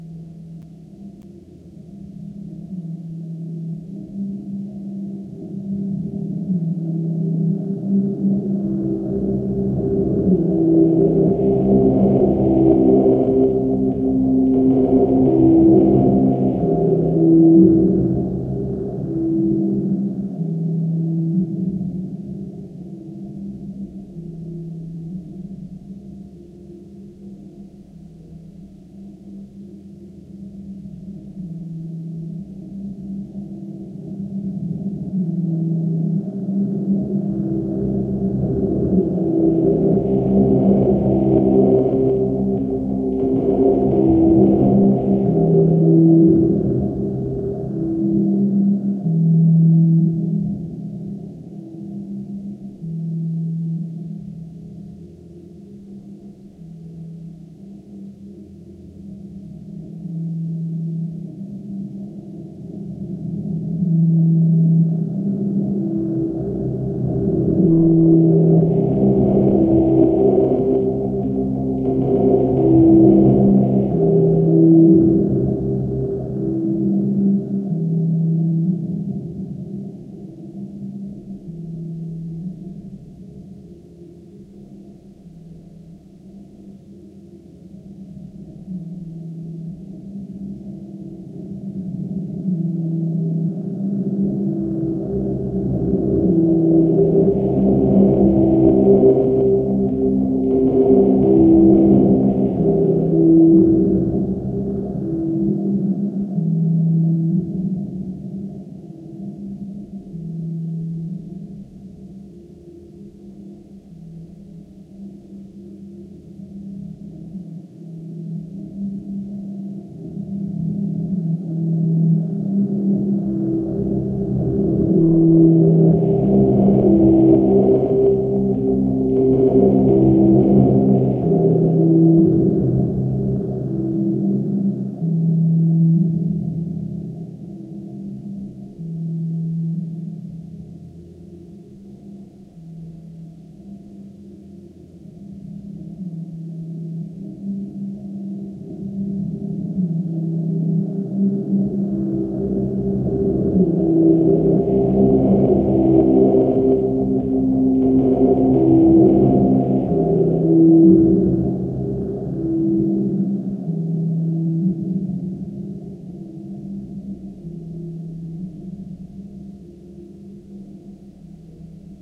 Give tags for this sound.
composition; music; collaboration; satellite; renoise; rpm; liveact

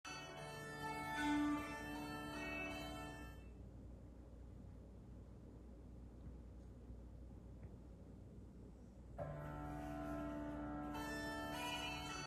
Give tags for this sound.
instruments; music; sounds

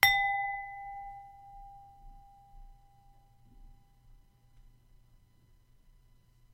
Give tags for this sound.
ambient; Bell; chime; Ding; Gong; Ping; Ring; Ting

